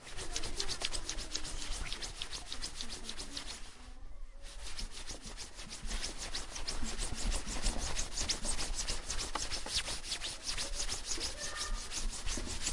TCR Sonicsnaps HCFR Anouck,Naïg,Florine,Clara coats
france, pac, sonicsnaps, TCR